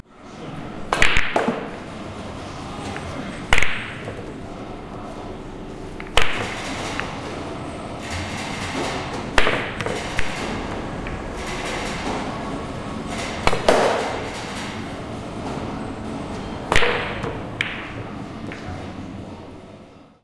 Recorded at a game place, in Guarda, Portugal! Sounds of billiard balls!